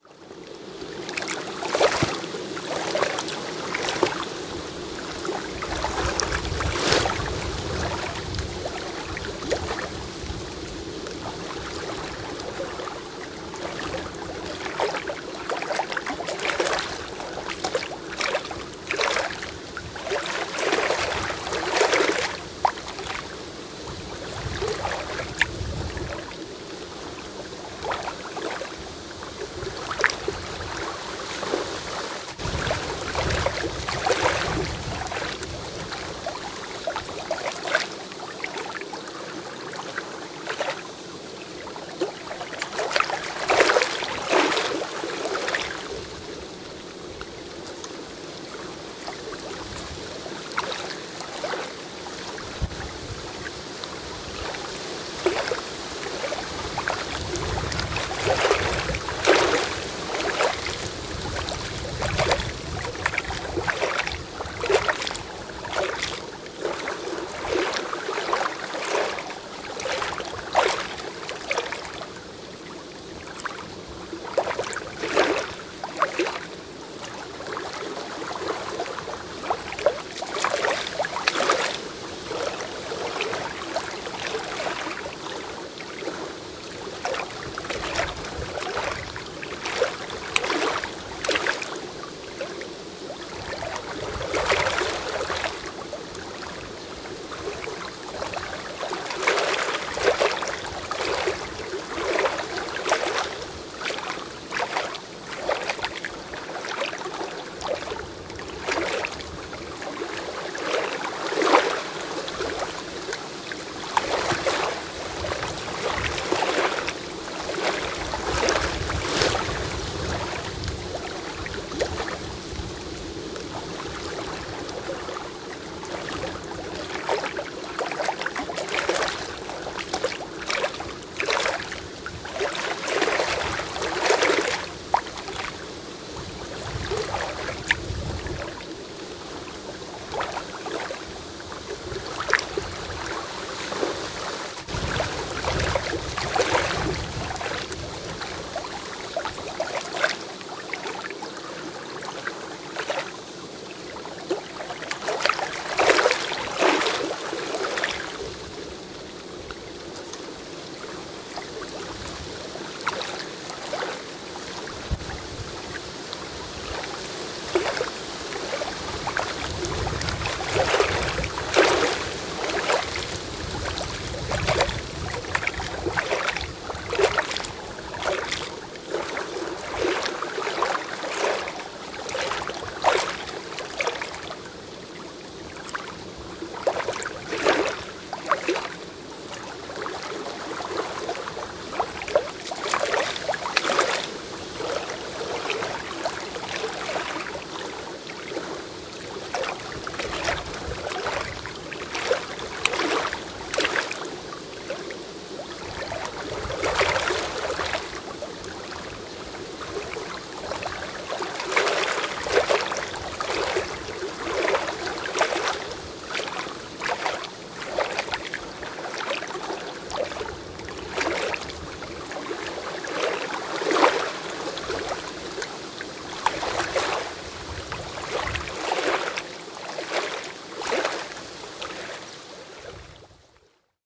This recording was done on a balmy day in early November at the shore of a small lake in southern Illinois. Even though it was November, I was in a short-sleeve T-shirt, because of the warm weather that we had been having. HOWEVER, there was a huge, approaching mass of cold air from Canada approaching which resulted in some tremendous, steady winds, gusting up to 40 miles an hour. I literally had my Zoom H4N recorder sitting in some sedge-type grasses inches from the lake, which enabled me to get the clear, crisp sound of the wind-driven wages breaking over the small rocks and sticks. Occasionally you will hear a dull roar of the wind, which I was able to decrease a bit using my graphic equalizer, although as you listen to the entire piece you will notice that I just could not eliminate all of the “wind roar”,. Still, I think this is an effective, realistic sound-record of what happens when cold air hits head on with warm air over a lake.
environment, wind-driven, nature